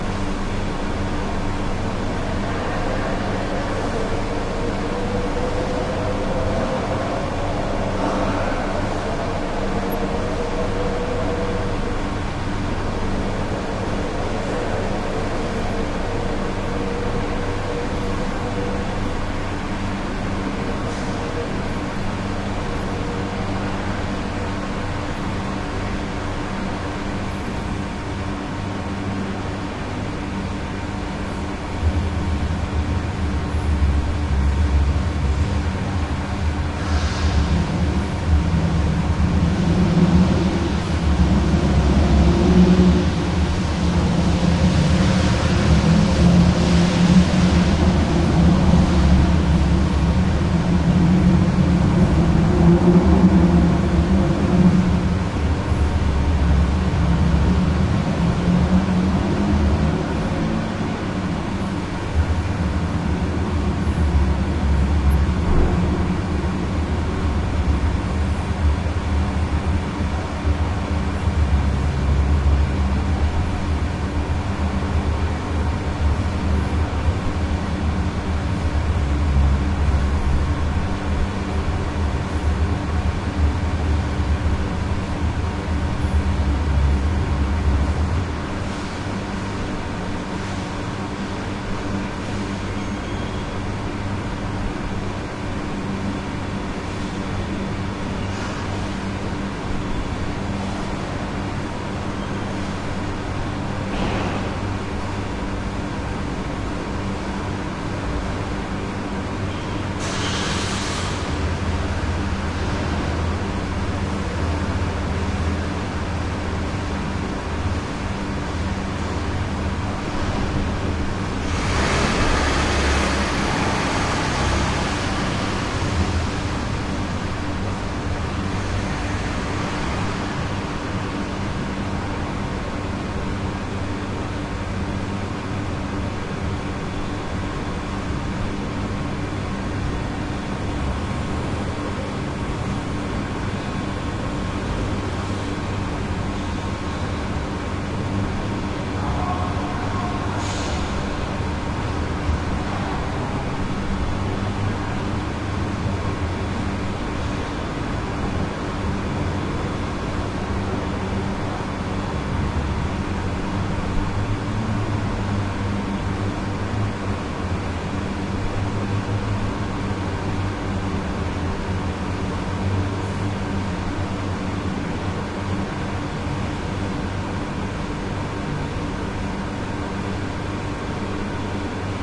Thailand room tone hotel open hall common area with air conditioning, fan, and distant traffic bassy echoey resonant through wall
Thailand roomtone hotel open hall common area with air conditioning, fan, and distant traffic bassy echoey resonant through wall